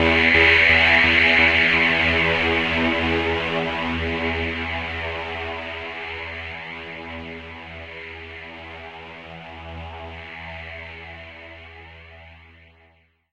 THE REAL VIRUS 08 - BANDPASS VOWELPAD - E2
Big full pad sound. Nice filtering. All done on my Virus TI. Sequencing done within Cubase 5, audio editing within Wavelab 6.
multisample, pad